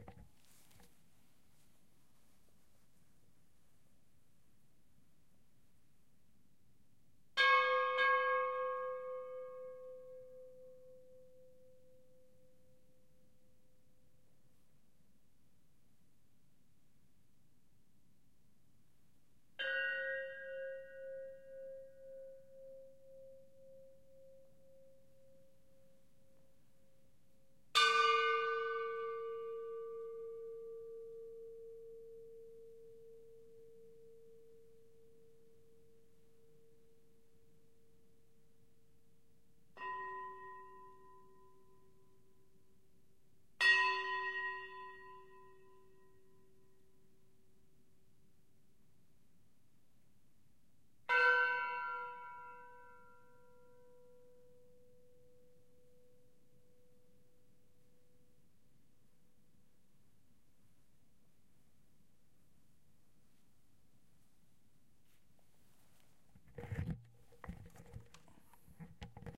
More Bells
Some bells - first played one by one, then some clusters. The bells were part of an art installation from Yougoslavian artist Stipo Pranyko, who was having a retrospective at TEA, in Santa Cruz de Tenerife. This was recorded in the big halls of the museum, that's why there's so much reverb.
Recorded with a Zomm H4 N.